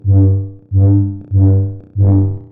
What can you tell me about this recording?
Lightsaber Whoosh
Star Wars parody whooshing sound